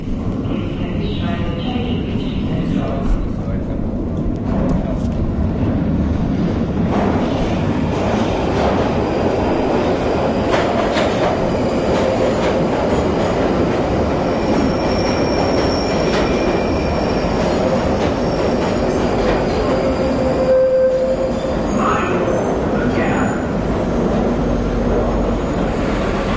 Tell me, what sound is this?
London underground 13 train arriving
Recording of a small announcement in the London Underground, a train arrives, passengers are reminded to mind the gap.
underground, field-recording